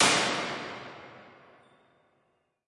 Small Plate 03
Impulse response of an American made stainless steel analog plate reverb. There are 5 impulses of this device in this pack, with incremental damper settings.
Impulse, IR, Plate, Response, Reverb